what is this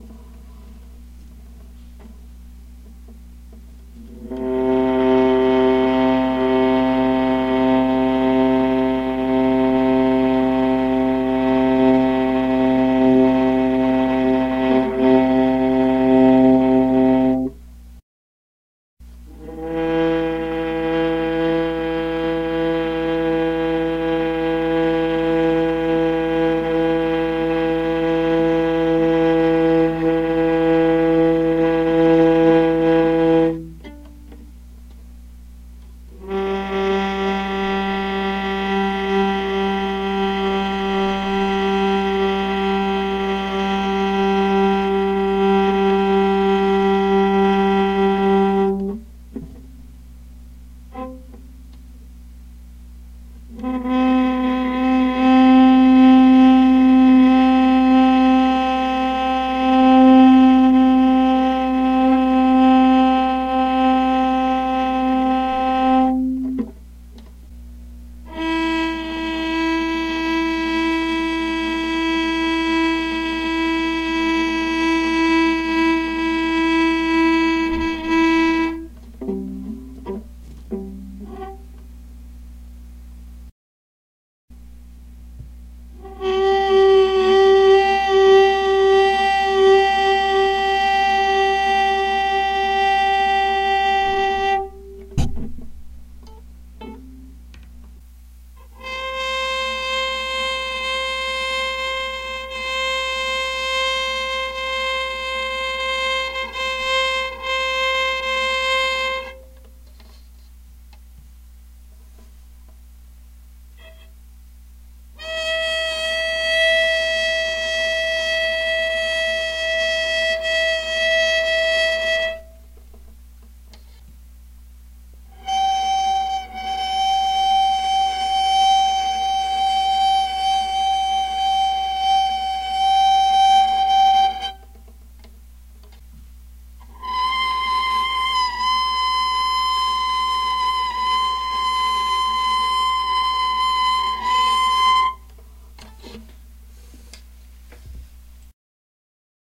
viola multisample 1 CEG 4 octaves no EQ
i am playing on a viola softly on C E and G across 4 octaves without applying EQ recorded with a dynamic microphone in my room with a computer using my hands to play the instrument
bow, strings, viola